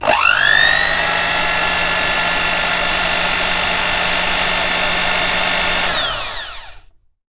BEAT02MT
A sample of my Sunbeam Beatermix Pro 320 Watt electric beater at low speed setting #2. Recorded on 2 tracks in "The Closet" using a Rode NT1A and a Rode NT3 mic, mixed to stereo and processed through a multi band limiter.
beater, kitchen, appliance, electric